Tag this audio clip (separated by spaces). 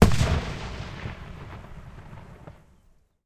bang explosion field-recording rocket